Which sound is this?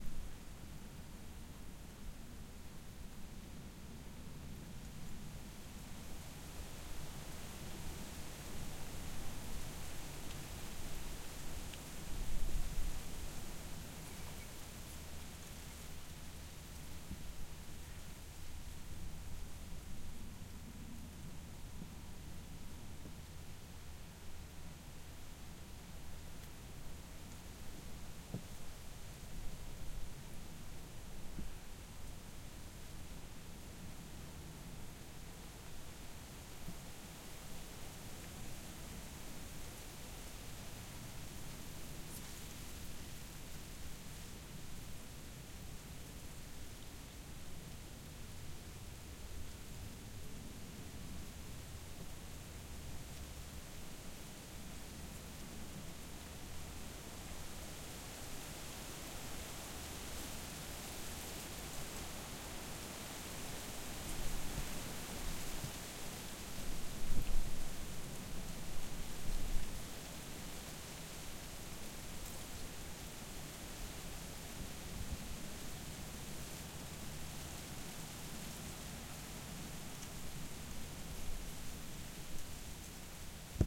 Field recording of a windy day